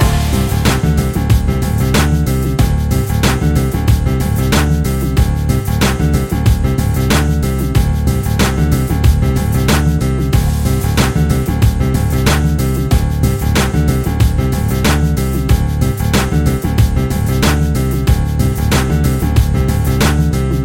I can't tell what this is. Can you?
Loop Nothing Can Stop Progress 06
A music loop to be used in fast paced games with tons of action for creating an adrenaline rush and somewhat adaptive musical experience.
gaming, indiedev, Video-Game, war, indiegamedev, game, victory, loop, gamedev, videogames, videogame, games, gamedeveloping, music-loop, music, battle